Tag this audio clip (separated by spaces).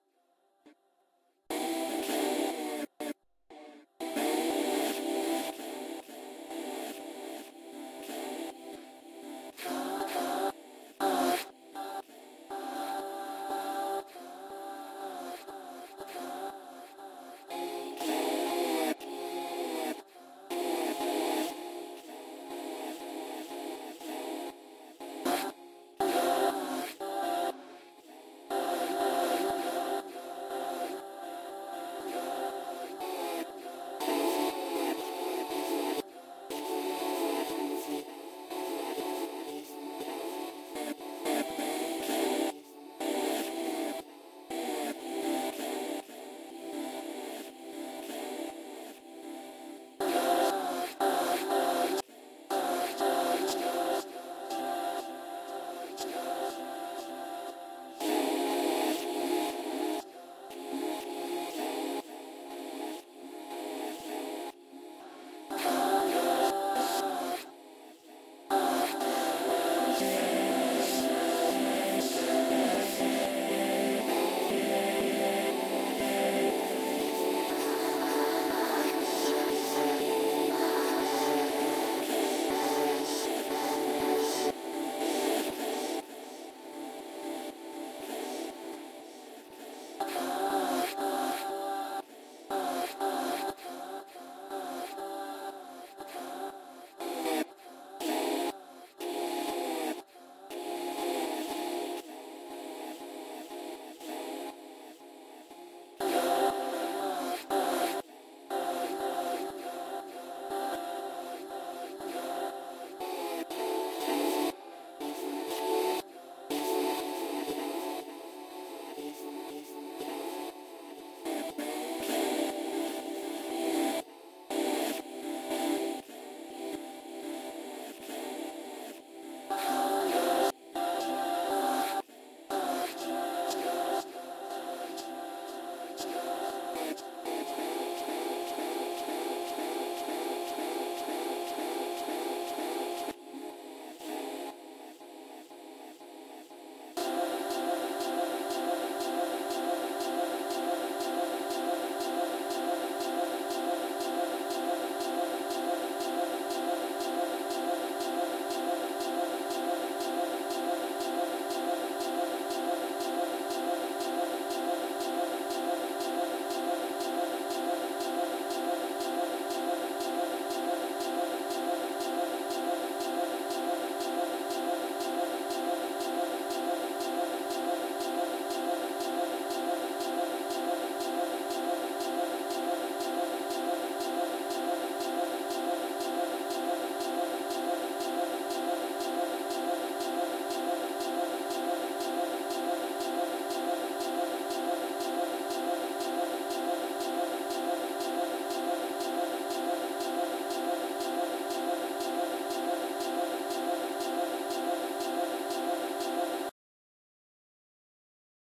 delay cut color ccmixter shifting cc